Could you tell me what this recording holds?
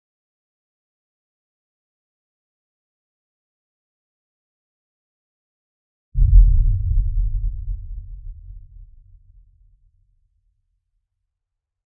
Low Movie Boom
Low bass-like boom used in film. I made this for a movie I am working on and I wanted to share it! Please use good speakers so you can hear it.
Low, Boom, Bass